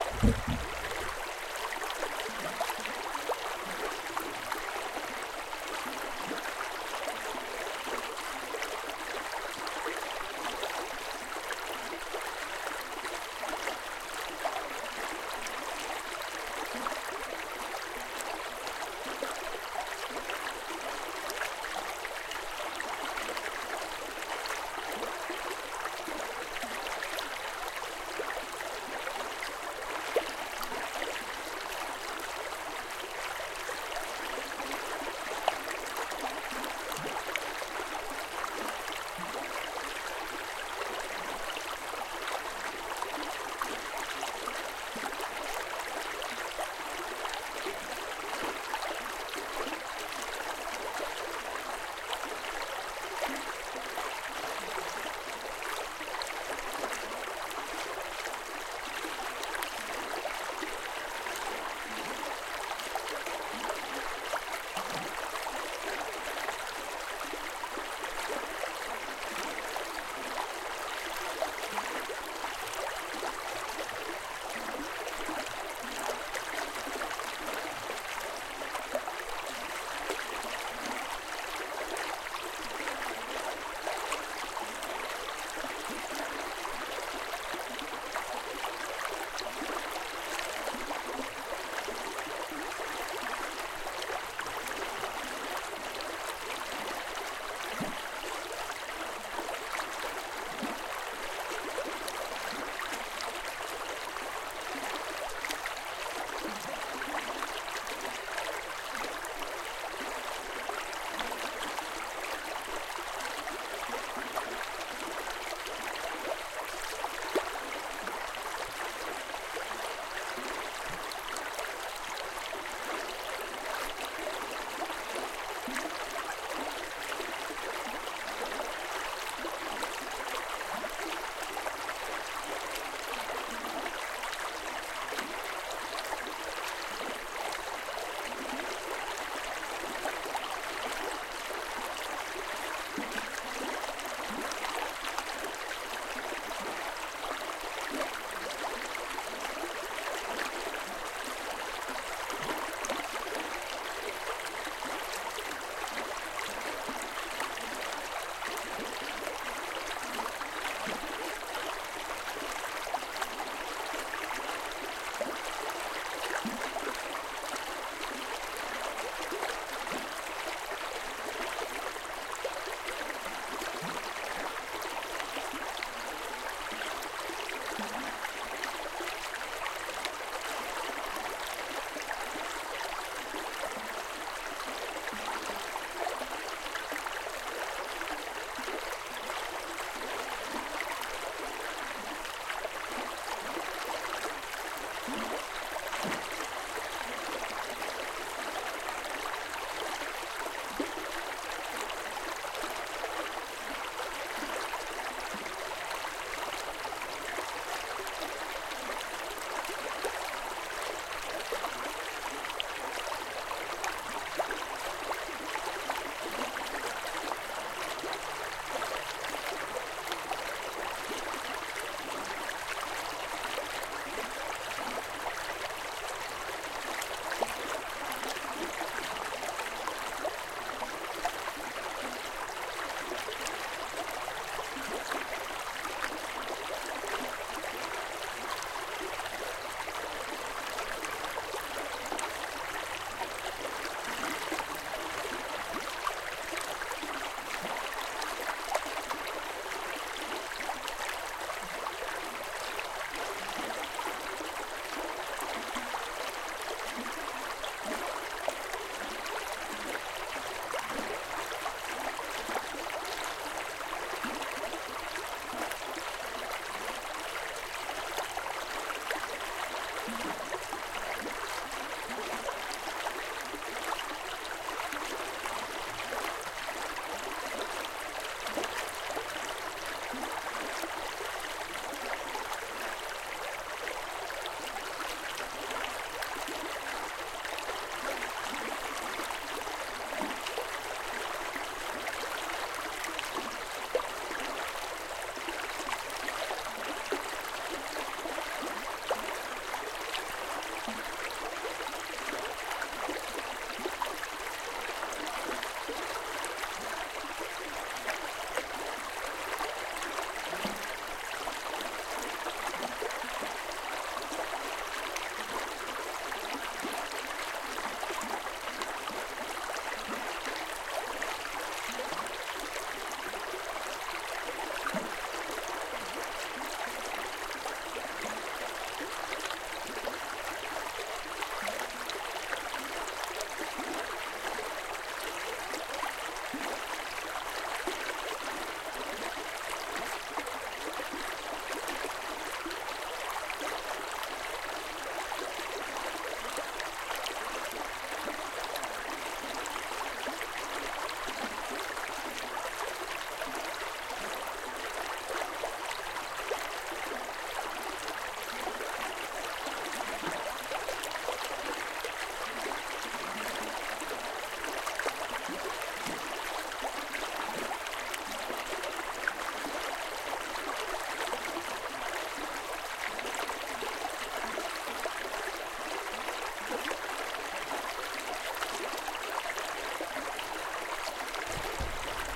winter river night
recorded in stereo on a Zoom 5, December 2021, at night after the nearby highway was quiet, the Tsolum River, north of Courtenay BC 06:22
flow, flowing, river, water